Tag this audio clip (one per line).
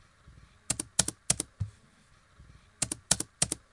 keyboard
typing
laptop